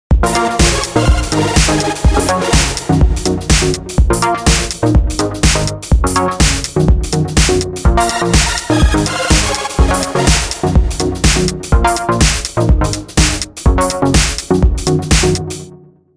Distro Loop
electronic, comppression, synths, fx, reverb, club, drums, clip, beat, flanger, trance, eq, limters, fruity-loops, sample, loop, dubstep, 2013, practise, mastering, house, bass, free, delay